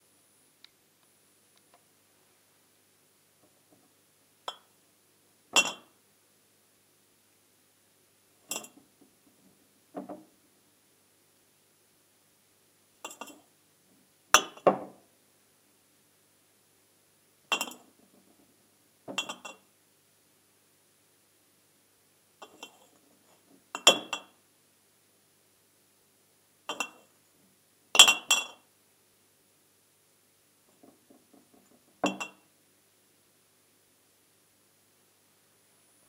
Glass Bottles Foley
A recording of someone moving around a couple of large glass bottles on a shelf. Originally recorded as foley for a film project.
beer, foley, move, against, bottle, shelf, wine, glass